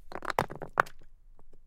Stein Aufschlag mit langem Decay 04
Recorded originally in M-S at the lake of "Kloental", Switzerland. Stones of various sizes, sliding, falling or bouncing on rocks. Dry sound, no ambient noise.
boulders, fall, sliding, movement, nature, bouncing, hit, debris